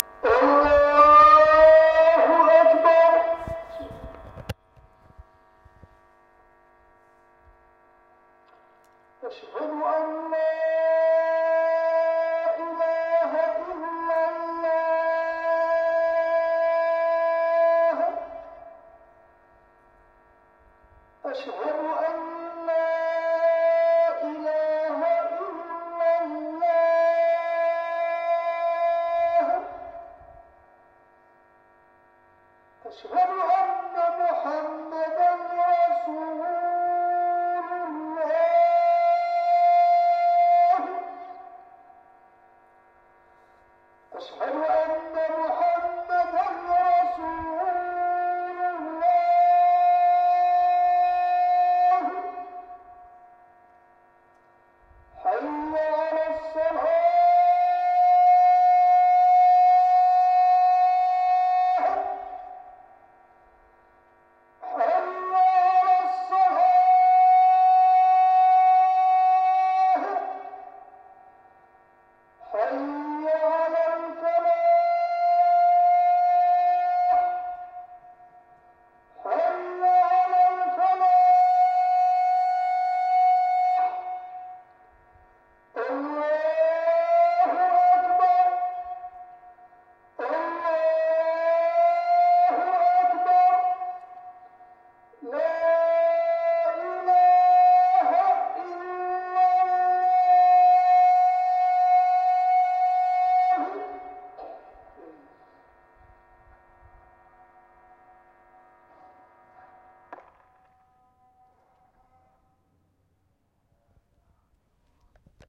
muezzin speaker nah
muezzin through speaker recorded in mirleft 2012
info from BACKLASH12:
"""this is called "azaan" the imaam of the masjid(mosque) recite it to let all know that it's time for Namaz"""
field-recording, muezzin, Namaz, mirleft, masjid, speaker, azaan